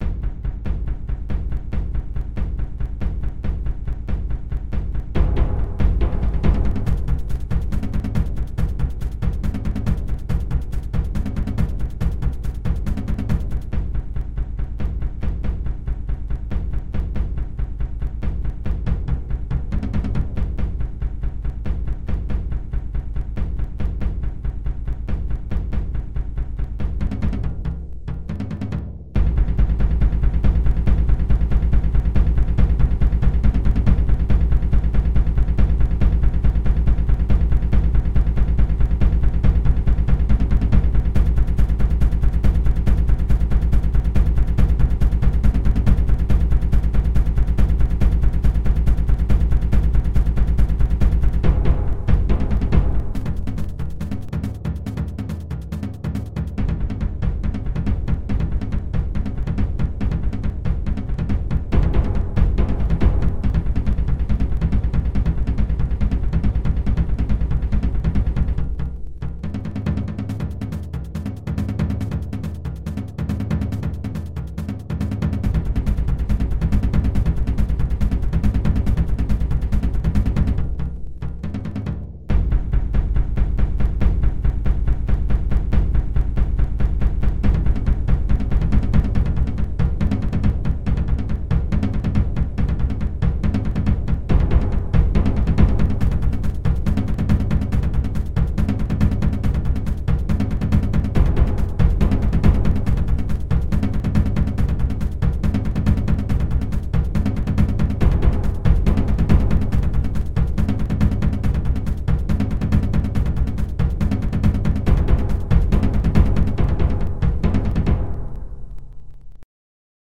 Music made 100% on LMMS Studio. Instruments: Tom, bassdrum acoustic, hit and brushes.